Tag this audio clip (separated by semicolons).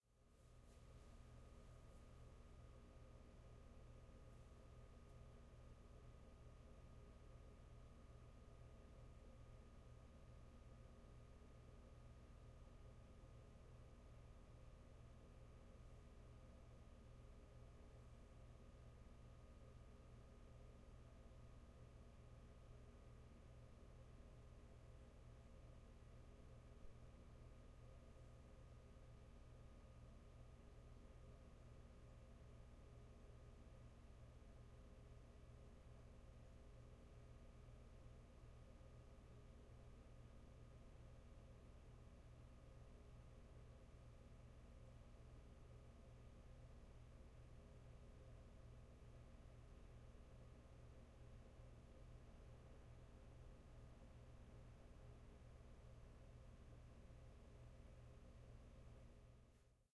ambience; audio-drama; AudioDramaHub; background-hum; background-noise; field-recording; freezer; garage